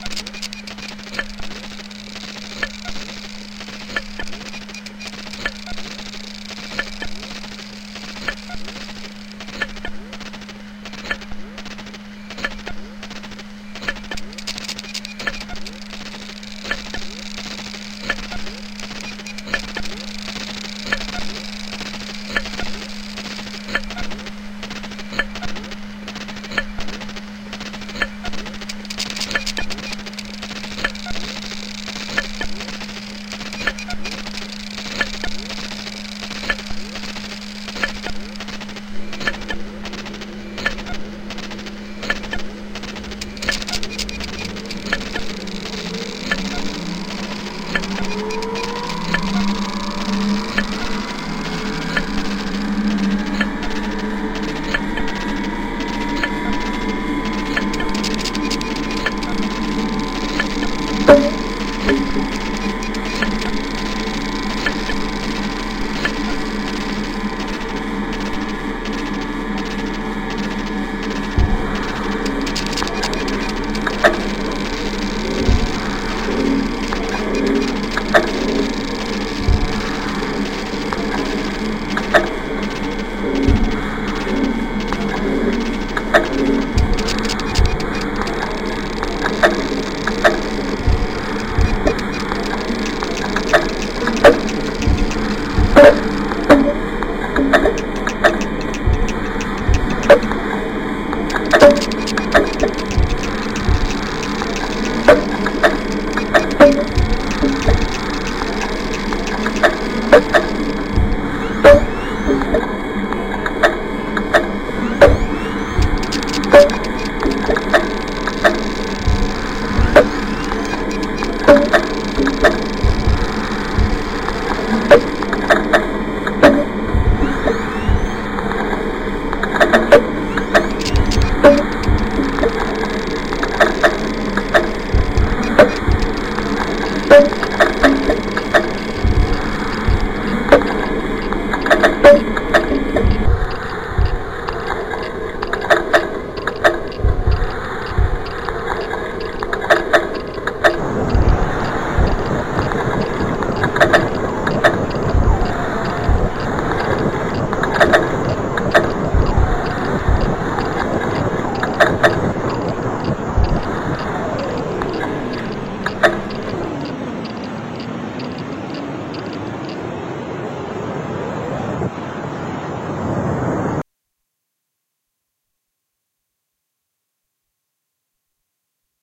Failing Hard Drives (Glyphx) in Time
Failing Hard Drives